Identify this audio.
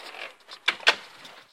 Old door close
A realistic, old closing door sound, for example RPG, FPS games.